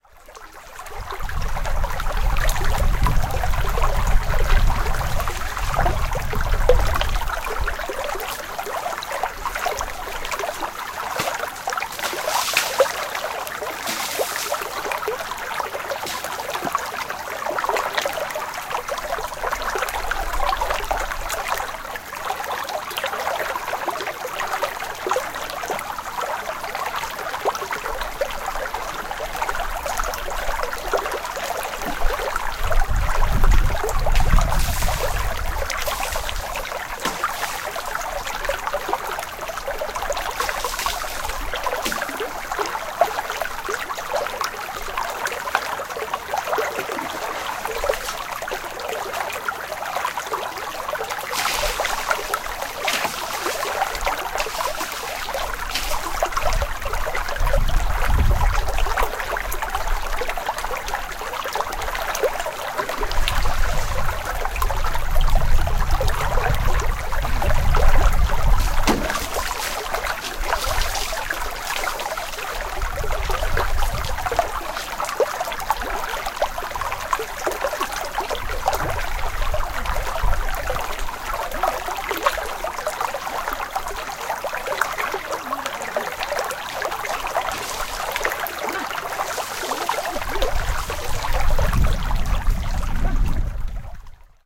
Field recording at Chemre Village, Ladakh, India. Recorded by Sony PCM-D1.